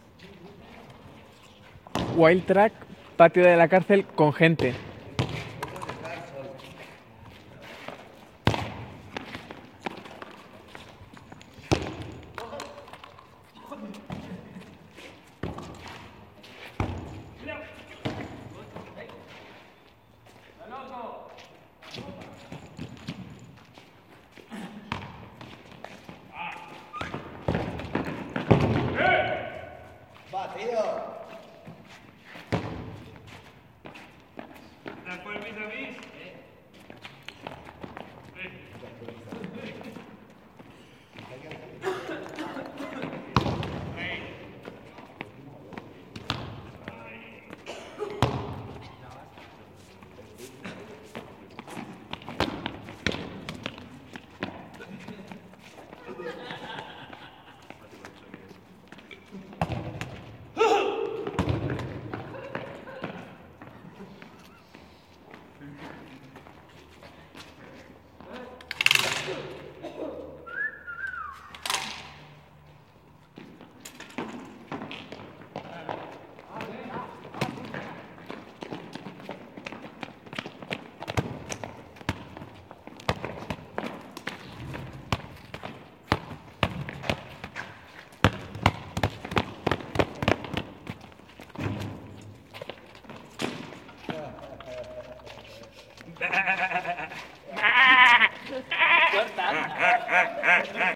Sounds recorded from a prision.